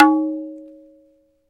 na-open
A more open variant of the ringing stroke na on the right tabla drum, dayan.
bol, drum, hindustani, strokes, tabla